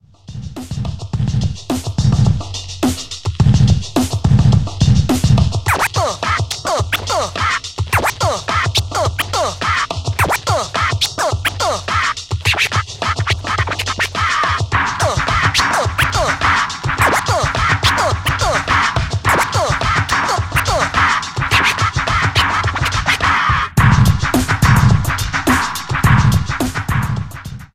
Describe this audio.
Example of the sample - Scratch Uh-paaah! 1 with a beat
This is just an example to show how that scratch sample sounds with a beat.
The sample of this scratching is this one:
The beat is made by me as well.
90, acid-sized, classic, dj, golden-era, hip-hop, hiphop, rap, s, scratch, scratching, turntable